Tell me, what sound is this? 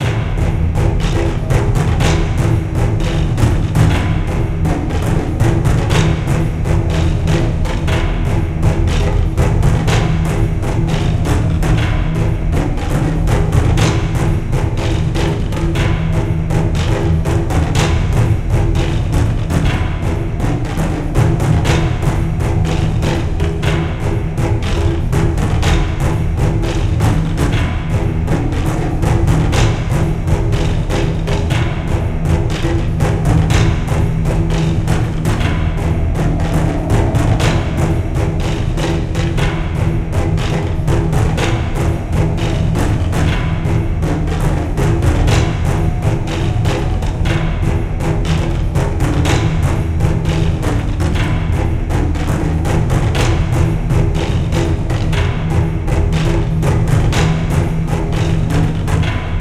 Monk in the wind - Drums Ethno Choir Monk Voice Drone World Hum Action Dark Cinematic Music Surround
120bpm, Action, Ambient, Atmosphere, Chant, Chants, Choir, Cinematic, Dark, Drone, Drum, Drums, Ethno, Film, Hum, Monk, Movie, Music, Soldiers, Surround, Thriller, Travel, Voice, War, Warriors, World